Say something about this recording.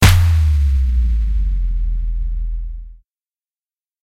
Deep Hit
A deep kick, suited for special effects. Made with Studio One and all kinds of effects.
Powerdown, Downriser, Boom, Electric, Error